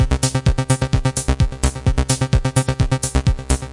Beat bass loop dance 128 bpm
Loop from "Duty to Beauty" jcg musics usa 2008
Description updated 9.3.23